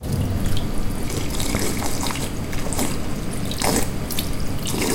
This is the sound of someone drinking on a fontain on the Roc Boronat building in UPF campus of Poblenou. The background noise is due to the engine of the fontain. It was recorded at 13:30.
Recorded using Zoom H4, normalized and fade-in/fade-out added with Audacity.
drink, campus-upf, UPF-CS12, water, Roc-Boronat, fontain